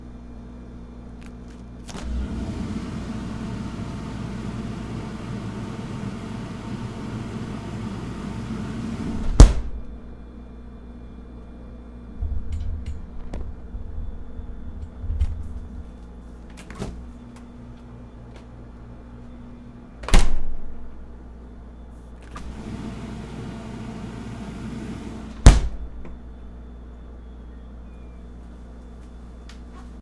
The sound of a refrigerator door being opened, and then the sound of the freezer in operation. A few variations follow.